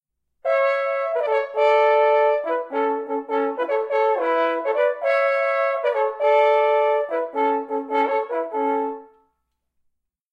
horncall beethoven7 Amajor
A bright, raucous theme in A major for two horns, from Beethoven's 7th symphony. Recorded with a Zoom h4n placed about a metre behind the bell.
7, A, horn-call, french-horn, horn, heroic, fanfare, beethoven, hunting, horn-duet, duet, call, symphony, hunting-horn, A-major